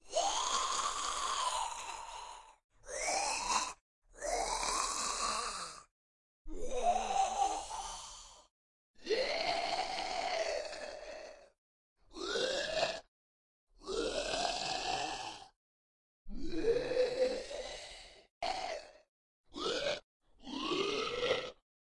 Multiple female zombie groans and grunts. Sounds like it's nearly decapitated.